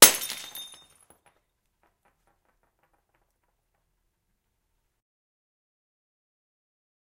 JK Bottle Break
A glass bottle breaks.
bottle, break, glass, glass-break